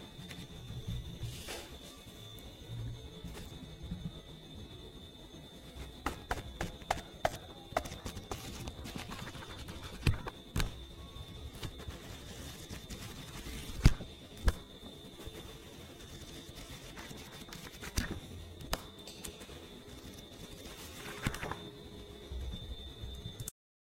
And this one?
I turn a book.